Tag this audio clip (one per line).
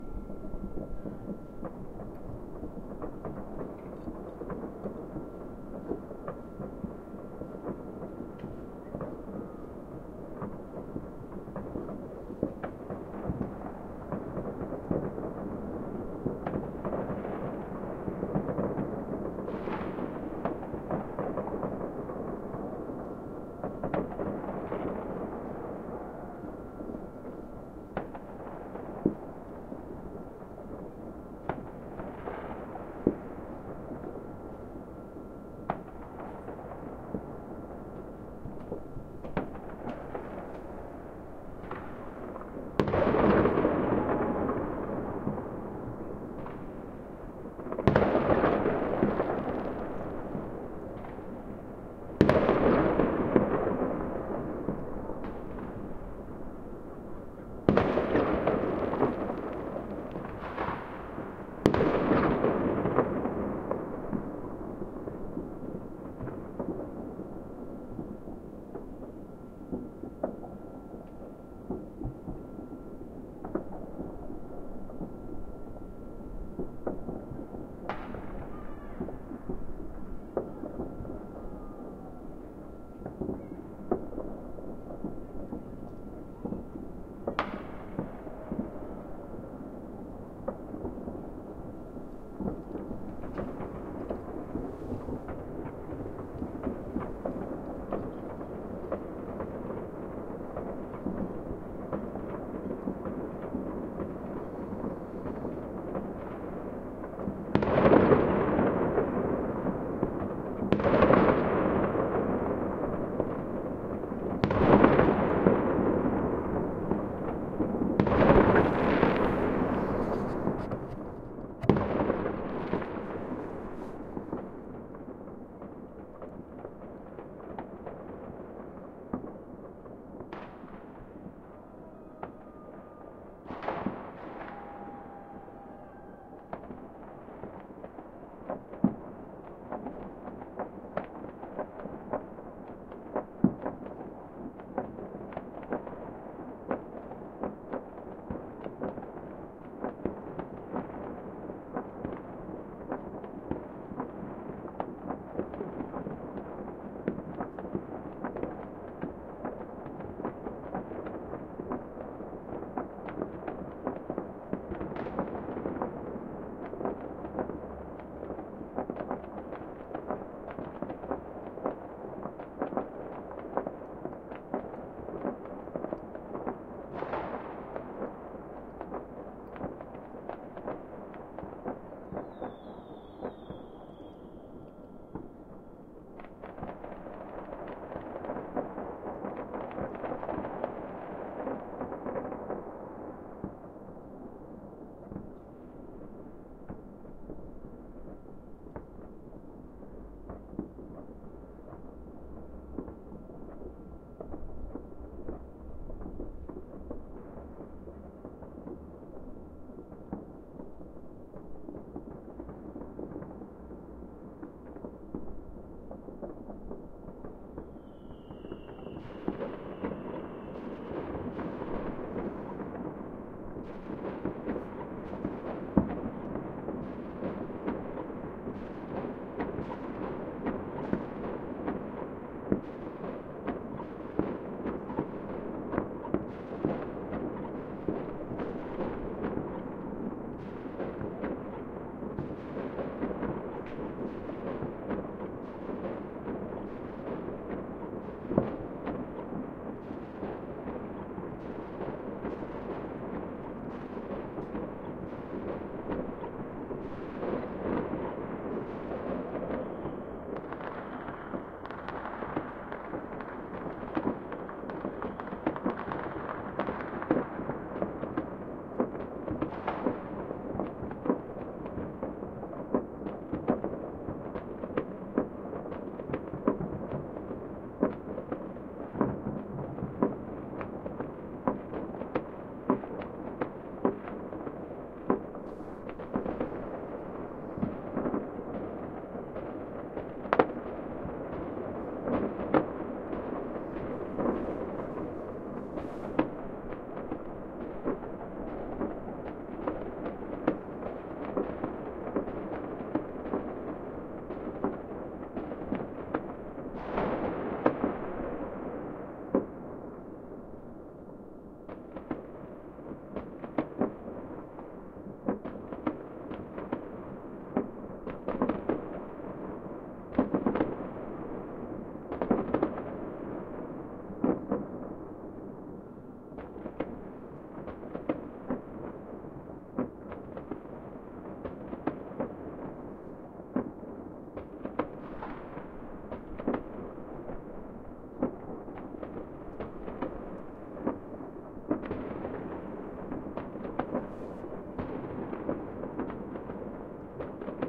rocket
balcony
rockets
urban
bomb
pyrotechnics
fireworks
war
nature
cannon
newyear
echo
firecrackers
fire-works
fire-crackers
boom
echoey
nye
city
echoes
battle
distant
missle
explosion
delay
mortar
outdoors
new-year